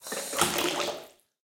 37 - 9 Closing a valve
Sound of closing water valve
valve, water, Pansk, Panska, Czech, closing, CZ